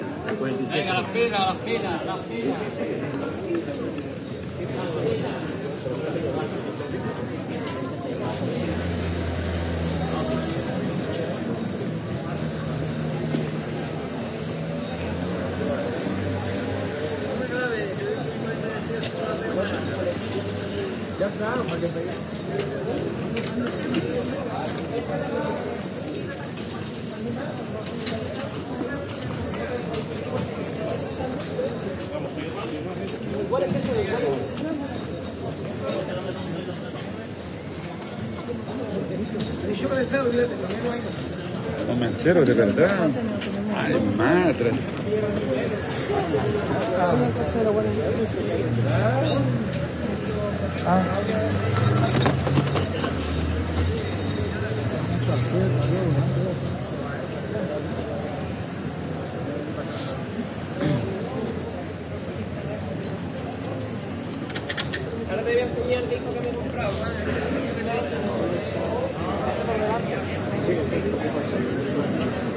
Fleamarket at Feria St (known also as 'El Jueves') in Seville, voices speaking in Spanish. Recorded during the filming of the documentary 'El caracol y el laberinto' (The Snail and the labyrinth) by Minimal Films. Shure WL183 into Olympus LS10 recorder
ambiance, field-recording, seville, spanish, voices